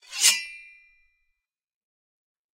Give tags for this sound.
blade; knife; medieval; metal; scabbard; sheath; Sword; swords

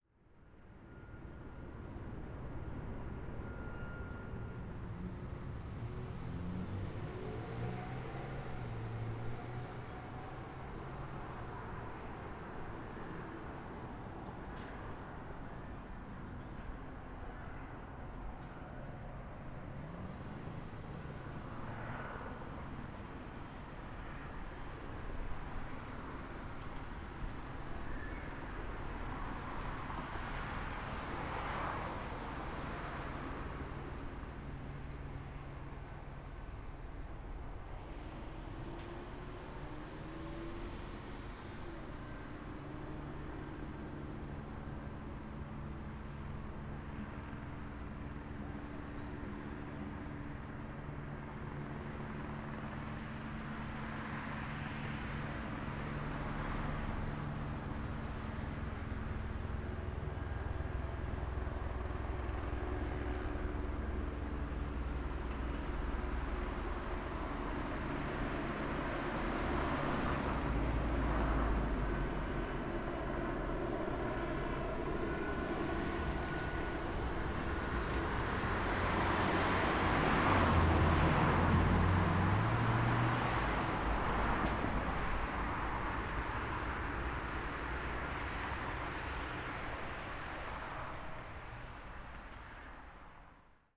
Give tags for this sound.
ambience; city; field-recording

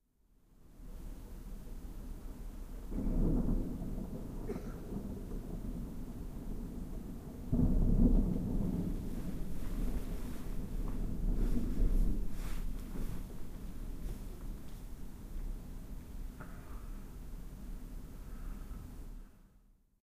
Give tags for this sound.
breath; bed; rain; thunder; thunderstorm; human; field-recording; body

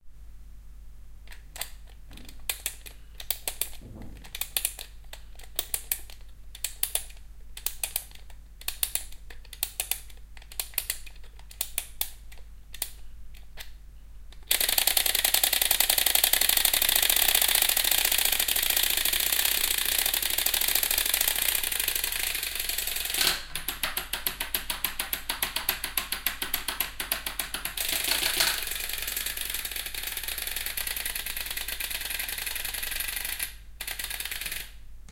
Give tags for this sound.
metal; hop; windup; walk; fast; flickr; dinosaur

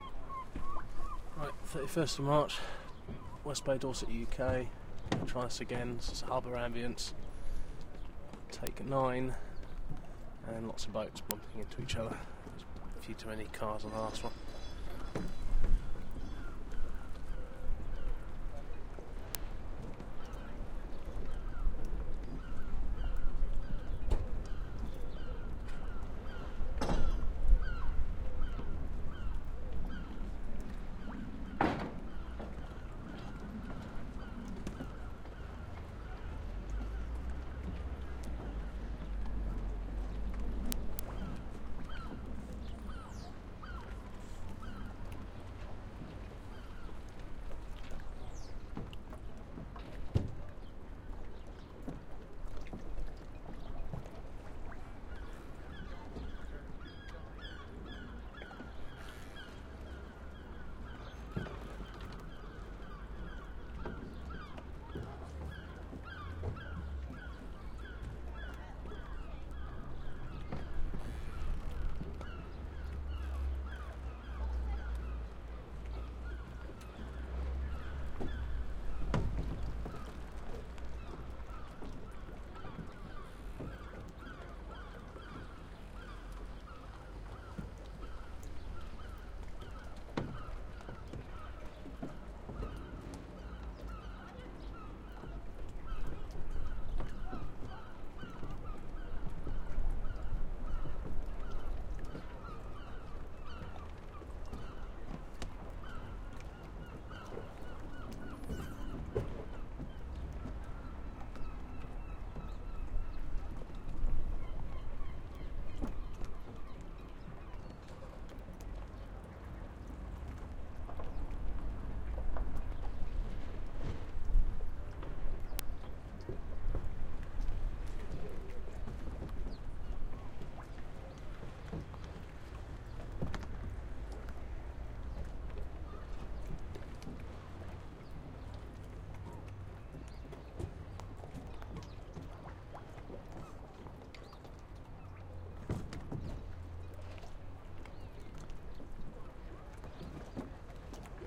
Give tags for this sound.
Ambience; Harbour; Small

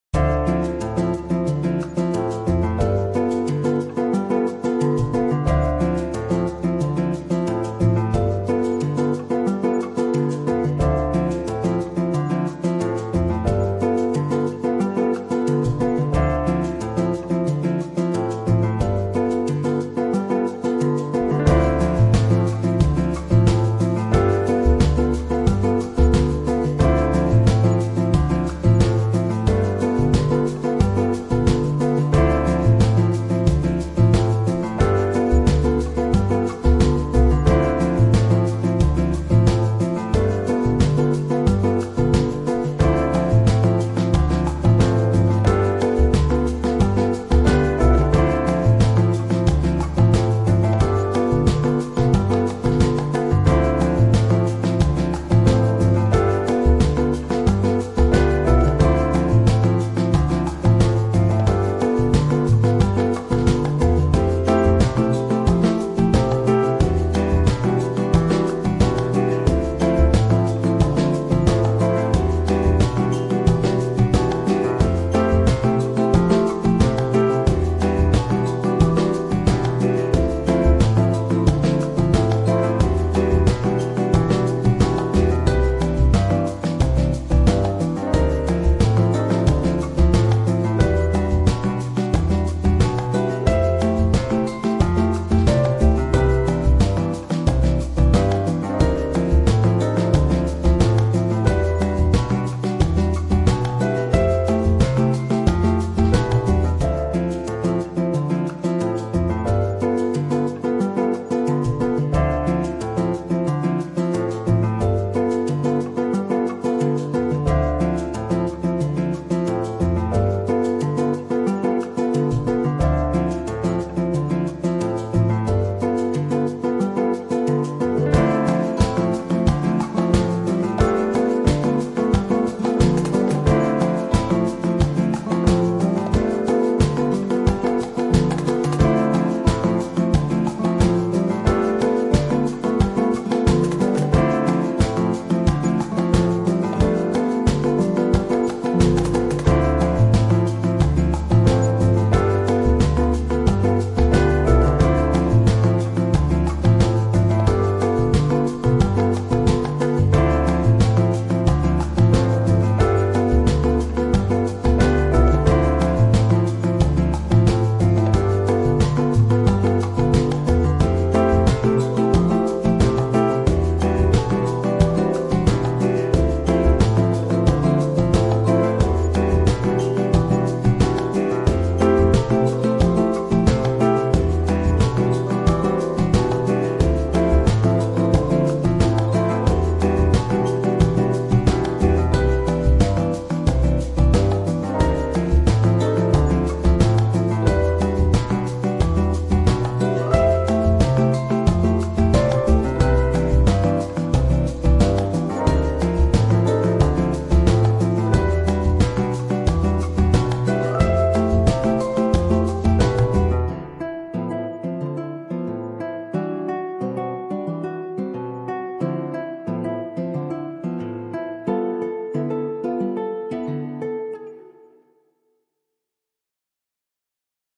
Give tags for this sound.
guitar; shaker; bass; drum; piano; bossa-nova; jazz

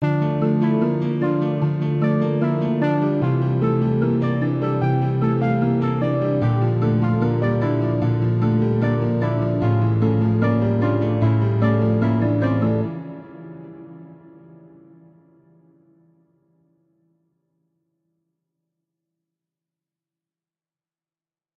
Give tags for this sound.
game; loop; ambiant; fl

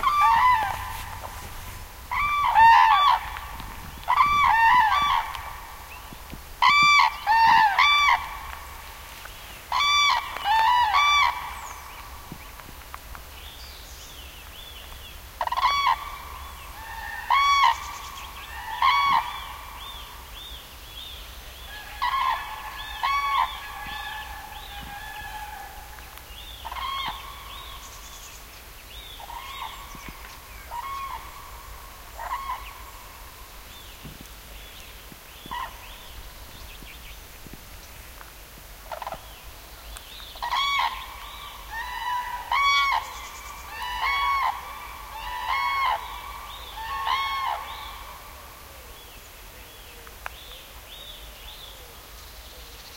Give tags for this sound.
birds; field-recording; park; spring

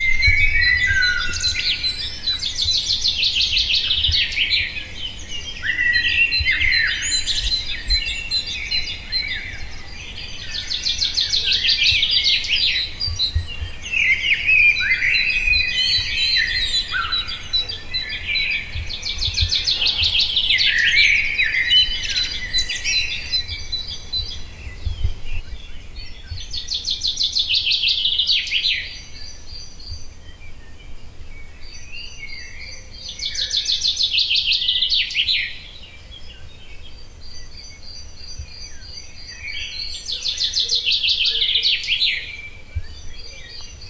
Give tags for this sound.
Birds; blackbird; chaffinch; natural-sound; tit

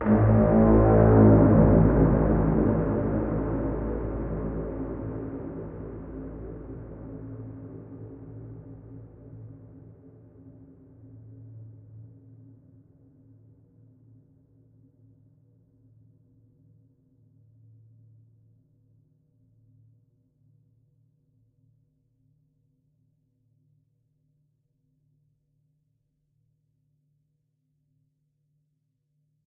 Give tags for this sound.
action; alien; aliens; artificial; computer; dark; deep; digital; effect; fantasy; film; future; fx; game; horn; intro; machine; mechanical; movie; robot; robotics; robots; science-fiction; sci-fi; scifi; sfx; sound; space; weird

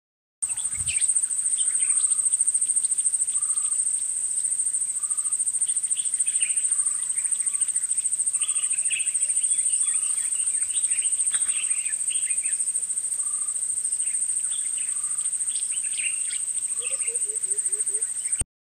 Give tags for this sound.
birds; ambience

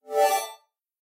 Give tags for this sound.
synthetic; short; select; teleport; game; button; hi-tech; switch; menu; option; click; interface; press

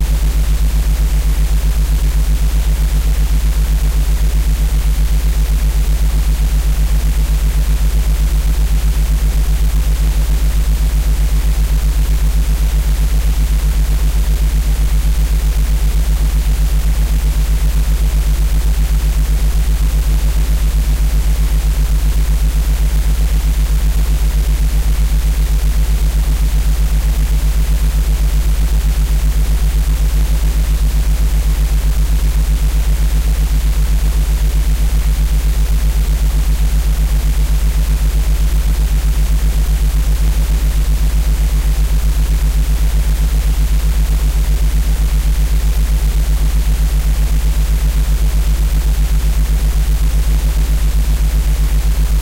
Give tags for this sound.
enrtainment; relaxation; beat

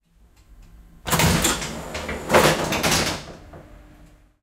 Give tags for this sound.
train; opening; pneumatic; open; machine; mechanical; sliding

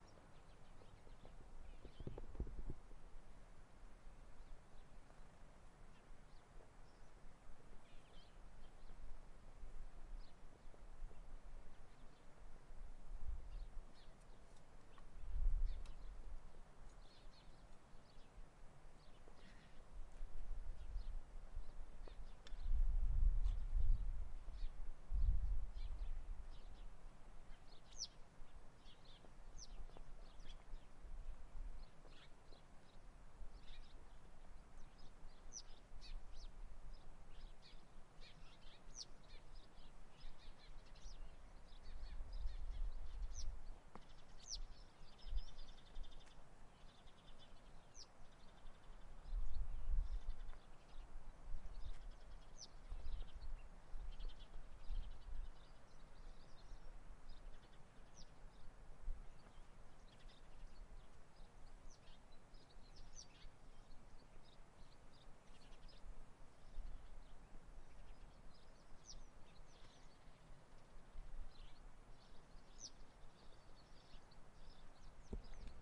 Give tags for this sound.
birds; village; ladakh

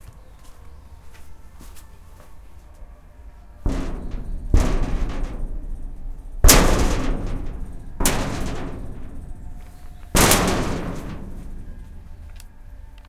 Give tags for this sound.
hit; outdoor; sheet; metal

